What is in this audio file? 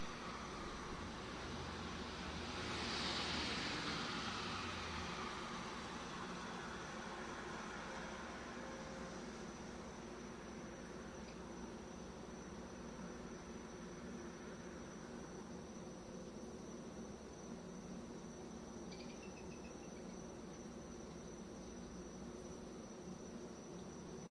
police chopper6 gone2
Police helicopter and a dozen cop cars, including a K-9 unit searching the hood, recorded with DS-40 and edited in Wavosaur. Things are calming down, another missed opportunity for vigilante justice...
police, manhunt, search, helicopter, chopper, field-recording